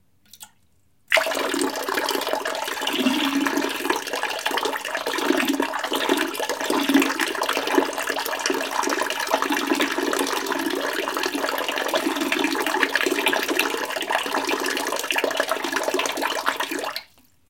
Dropping water
Water falling into water. It could be used as pee.
agua, caer, cayendo, drip, dripping, drop, liquid, pee, pis, splash, water